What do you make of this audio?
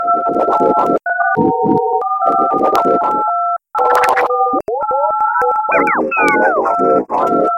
Alien TV Transmission
Effect, Dubstep, Spooky, Sound, Background, Noise, Dub, Synth, Audio, Alien, Ambient, Electronic